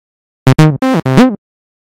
Untitled song7
Loops generated in Propellerhead Reason software.
acid, reason, loop, propellerhead, house, tb-303, 303, roland